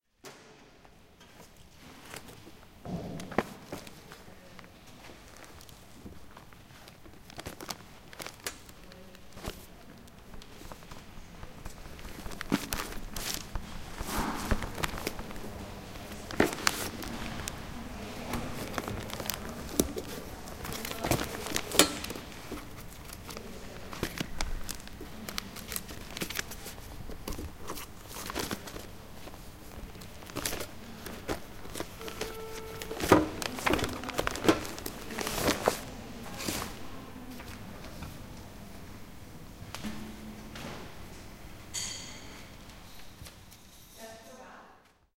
This sound was recorded inside the upf poblenou library, near to the shelf whichs holds newspapers. The recording reflects the sound that a library employee makes when it is time to throw away the old newspapers and replace them by new ones. It was recorded with an Edirol R-09 HR portable recorder.